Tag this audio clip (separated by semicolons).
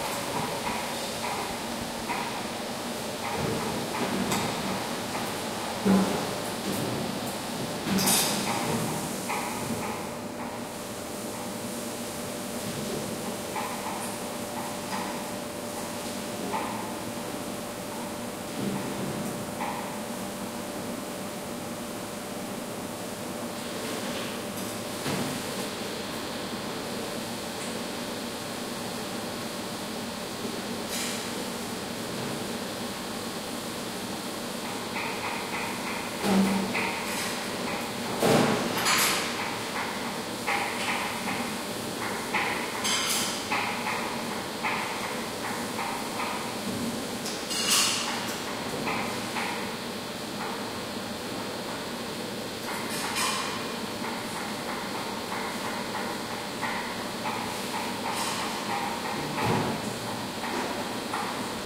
athmosphere; canteen; chop-vegetables; Russia; Siberia; water; West-Siberia